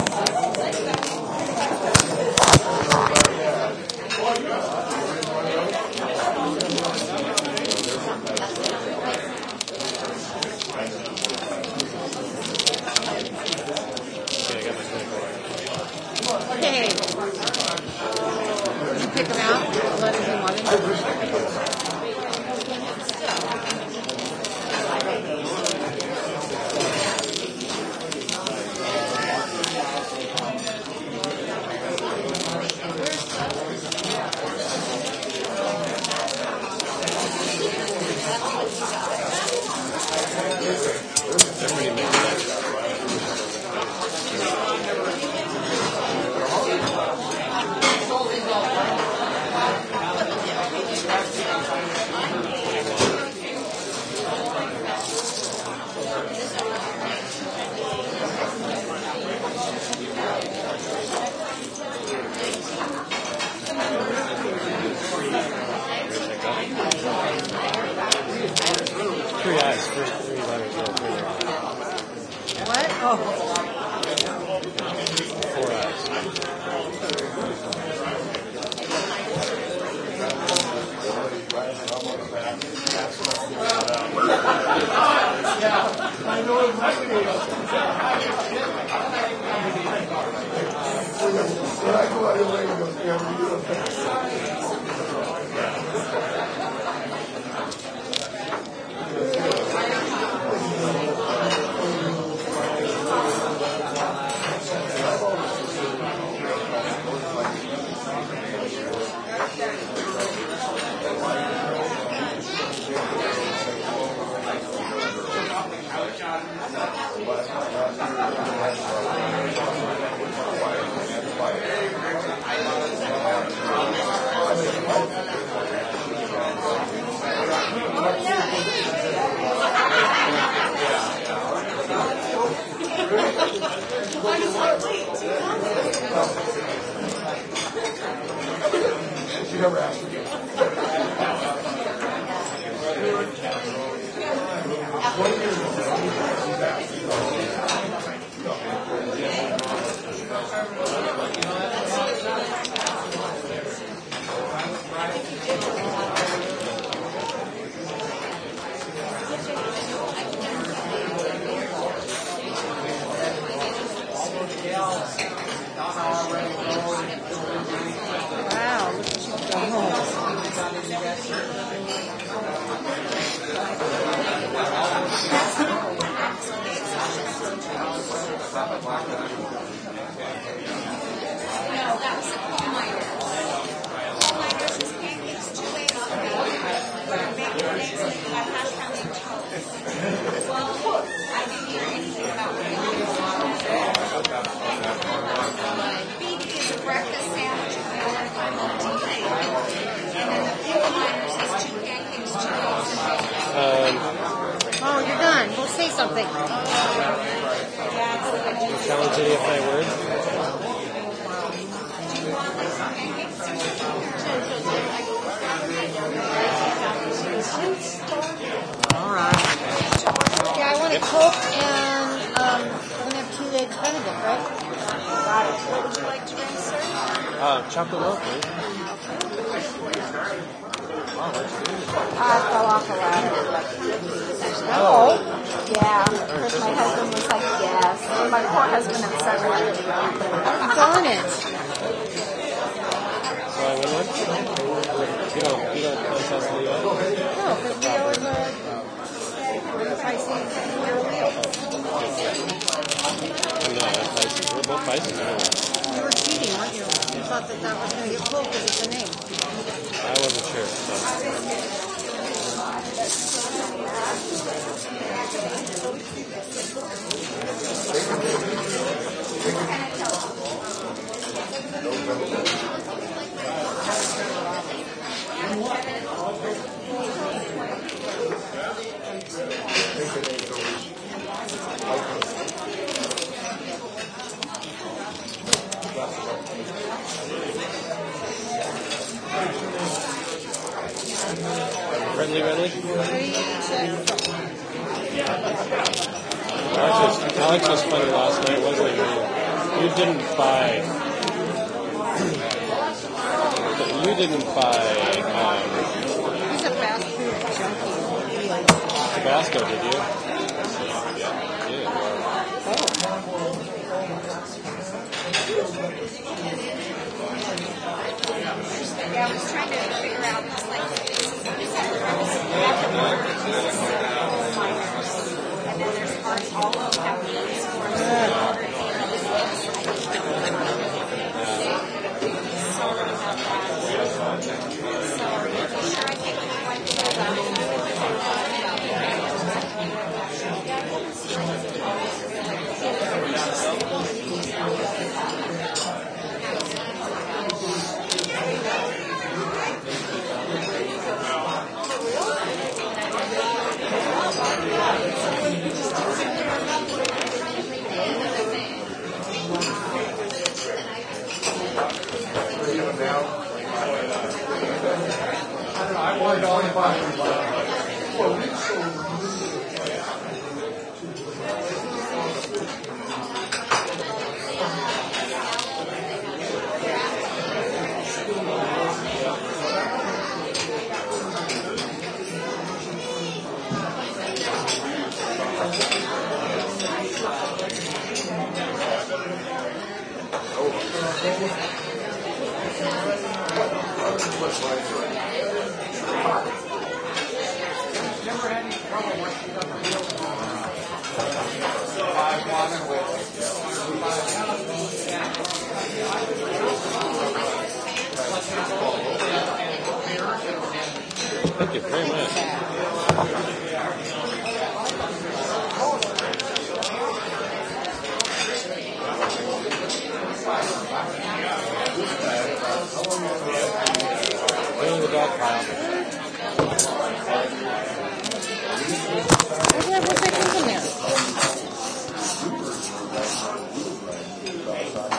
restaurant ambiance busy talking in english plates and glass noises busy happy morning restaurant in black diamond wa usa